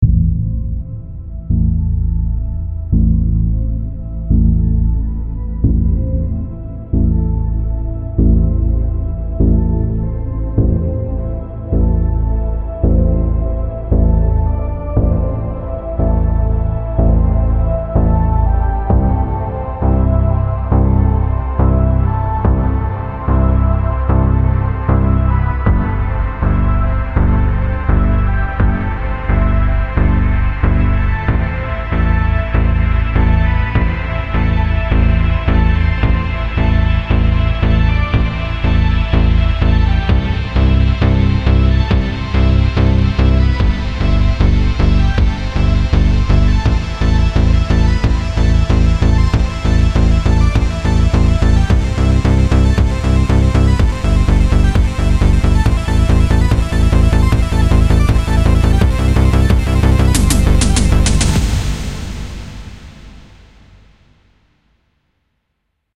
A electronic intro with a 80s vibe, Enjoy!
80s, Arcade, Electronic, FX, Intro, Madness, Movie, Music, Oldschool, Synthesizer, Synthwave